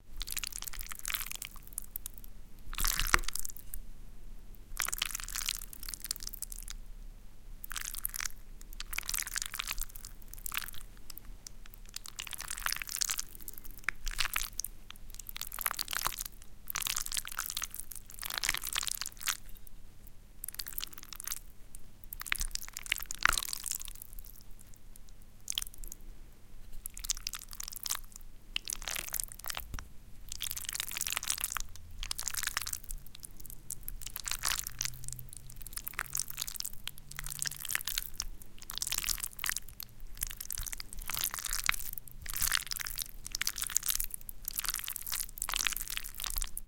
Schmatzschmatz dry
The sound of noodles (with sauce) touched by a spoon. Recorded with two RHODE NT 5 directly into a Presonus Firepod.
noise, larva, unprocessed, disgusting, schmatz, noodles